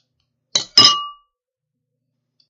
Dropping a spoon in a bowl
Dropping a spoon into a small ceramic bowl
eating, food, spoon, ceramic, bowl